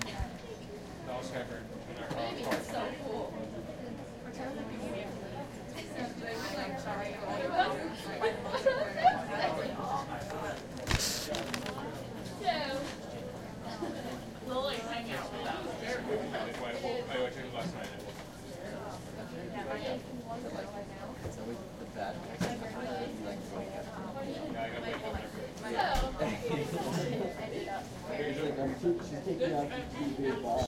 Bistro/ Coffee-shop Ambient, about 30 people, laughter and conversation.
coffee-shop, talking, college, bistro